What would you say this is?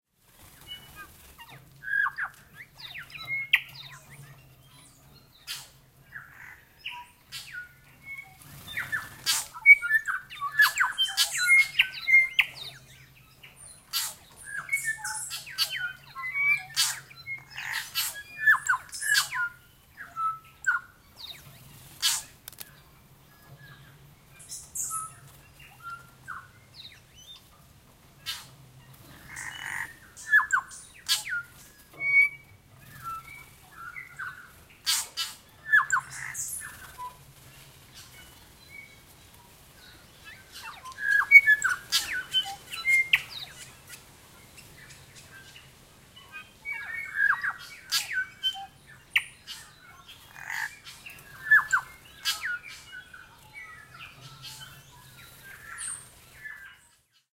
New Zealand native Bellbird (Korimako). Recorded on Kapiti Island, a bird sanctuary on the West Coast of the North Island, NZ. Recording was made at some feeder stations set up for the native Stitchbird (Hihi) but it seems that the Bellbird was the predominant species on the day. This is in a light forest setting with a large number of birds moving around calling.
NZ, H2N, New-Zealand, Birdcall, Kapiti-Island, Field-recording